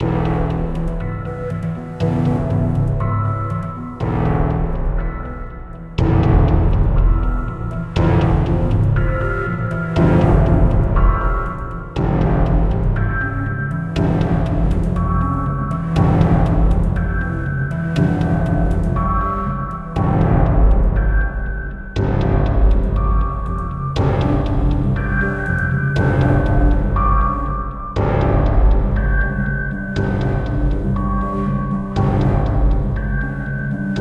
Battle - Cinematic soundtrack music atmo background
action, ambience, ambient, atmo, atmosphere, background, background-sound, Beat, Cinematic, drama, dramatic, music, phantom, sinister, soundtrack, thrill